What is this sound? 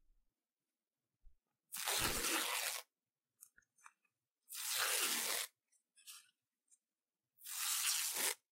Tearing paper/clothes Sound
destroy, tearing, tearing-apart